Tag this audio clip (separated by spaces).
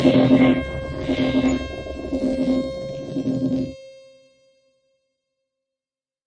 distorted effect fx granular industrial low reverb rumble